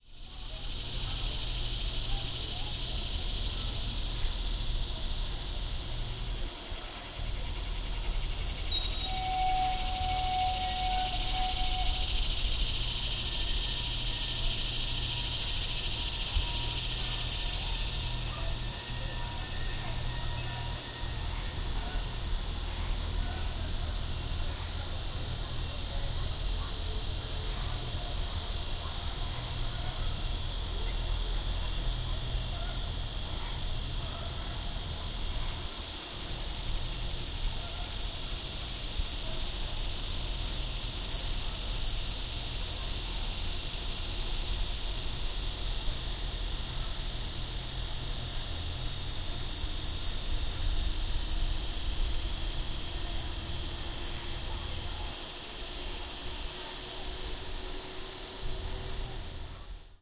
Ambience, Jacksonville Zoo, A
A minute of audio taken from within Jacksonville Zoo in northern Florida. You can hear several insects, distant visitors, the whistle of the train that circles the park, and distant hums of machinery including several cooling fans. A little bit of EQ to remove wind interference. If you're in the local area, do give this zoo a visit!
An example of how you might credit is by putting this in the description/credits:
The sound was recorded using a "H1 Zoom recorder" on 22nd August 2017.